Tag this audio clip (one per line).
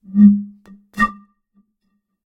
33cl
air
blow
blowing
blown
bottle
building-block
closed-end
columns
glass
one-shot
recording
resonance
resonant
sample-pack
samples
tone